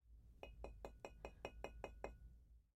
glass jar tap knuckle
a large glass jar being knocked on by a knuckle
jar, glass, tap, knuckle